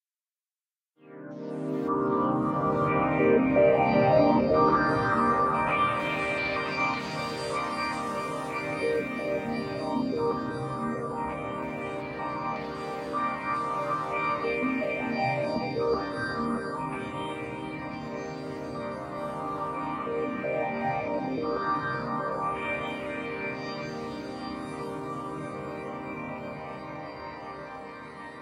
Sequence/ Pad made using Reason 4's Thor with added effects from Ableton Live
ambient, dreamy, pad